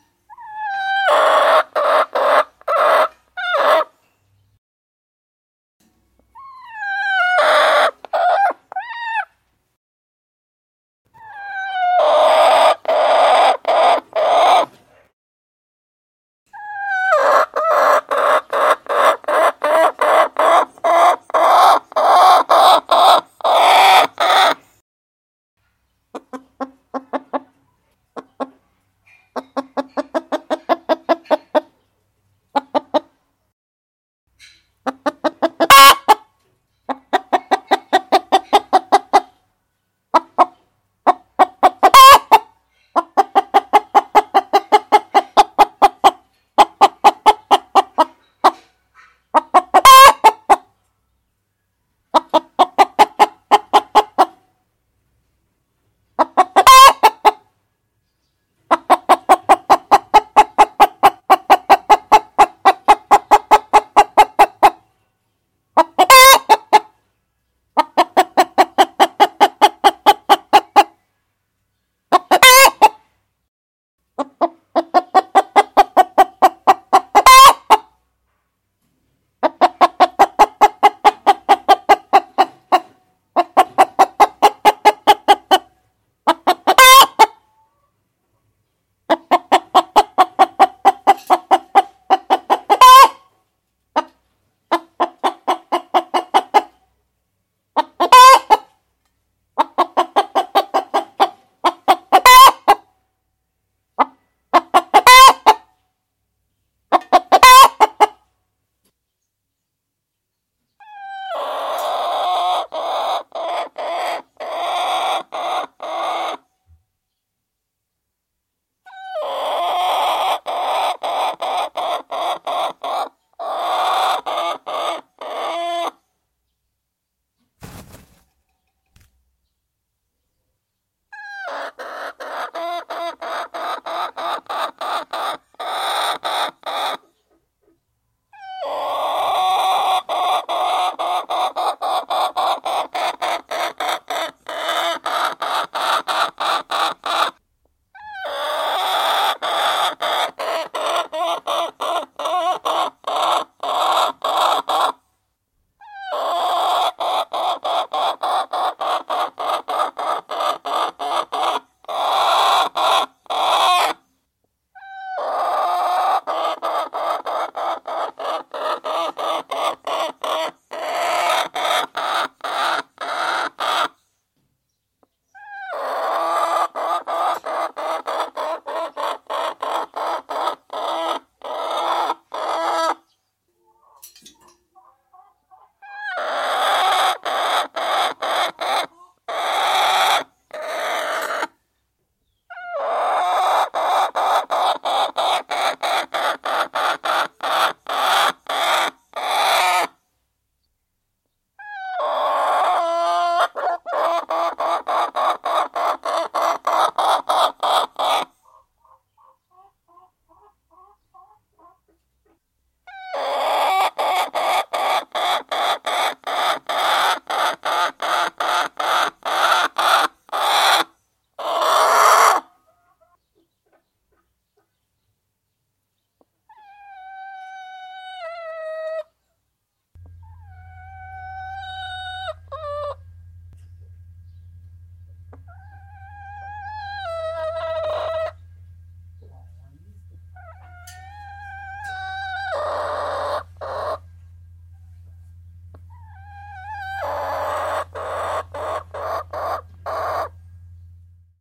190208 chicken hen
My mother-in-law's hen is going to lay an egg....
Hen, Chick, Chicken